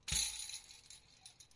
Bells on my front door, closing the door, and me hitting various objects in the kitchen.